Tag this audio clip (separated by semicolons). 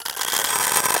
byron; e; f; felt; glitch; p; s